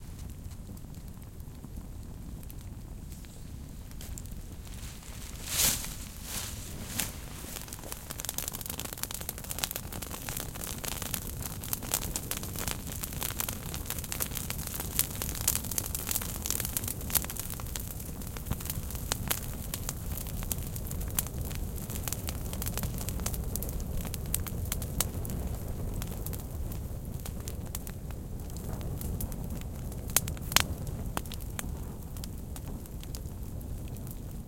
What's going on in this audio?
brushwood in the fire 2
I put brushwood on fire.
Edited and normalized.
Прикольно осенью цыплят считать
burning, hiss